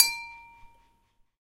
tapping a glass in the kitchen
tap, kitchen, bell, tapping, glass